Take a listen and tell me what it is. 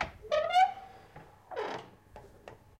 Opening a squeaking door to a room. (Recorder: Zoom H2.)